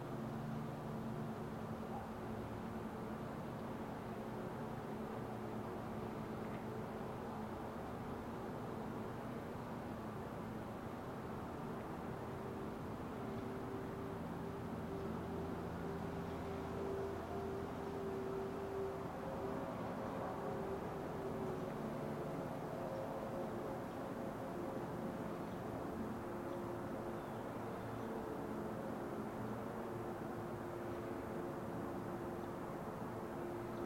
Roomtone Outside Neighborhood Day
neighborhood room-tone outside day
day, neighborhood, outside, room-tone